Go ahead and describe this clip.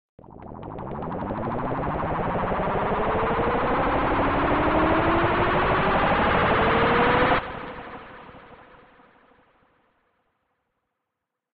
Frequency Riser
I tweaked the pitch envelope on this little synth phrase and added reverb and a frequency shifter.